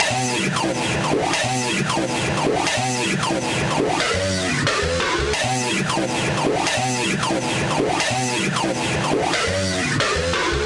1 - trouble hardcore high 120 2
Strange and dumb voice-bassline
180, bassline, loop